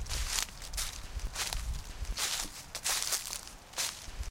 Four steps on dry leaves.
End of summer 2017. Vivers Park, Valencia, Spain.
Sony IC Recorder and Audacity by Cristina Dols Colomer.